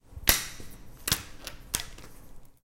pen table
percussive sound produced by hitting the pen on the table. This sound was recorded in silence environment and close to the source.
table, pen, UPF-CS13, campus-upf, hit